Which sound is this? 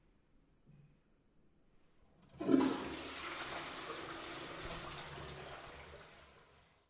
Water sound due to stretching of the toilet chain. Recorded with a Zoom H2. Recorded on a Campus Upf bathroom.